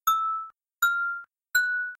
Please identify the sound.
pling sound effect ui interface ding ting sound
Used as a 'checkmark sound' in videos and UI. Sound of a wine glass. A short pling / ding / ting sound.
checkmark, ding, effect, glass, high, higher, interface, pitch, pling, sfx, short, sound, ting, tone, tones, ui, wine